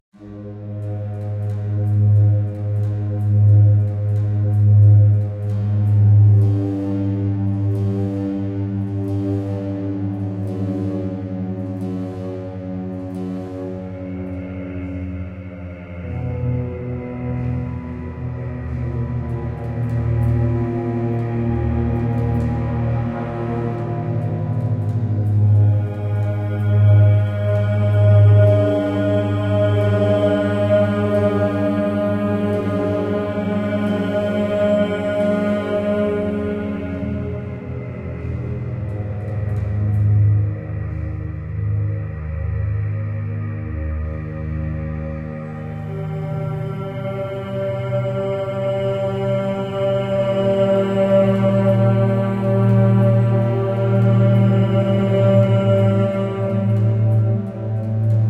Sad Alone Orchestra Strings Vocal Choir Drama Noise music Mood Surround
Alone, Choir, Contemporary, Drama, Modern, Mood, music, Noise, Orchestra, Sad, Slow, Sound, Strange, String, Strings, Surround, Thriller, Vocal, Wait, Walk